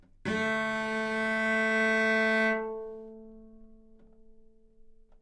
Cello - A3 - other
Part of the Good-sounds dataset of monophonic instrumental sounds.
instrument::cello
note::A
octave::3
midi note::45
good-sounds-id::449
dynamic_level::f
Recorded for experimental purposes
good-sounds, single-note, cello, multisample, A3, neumann-U87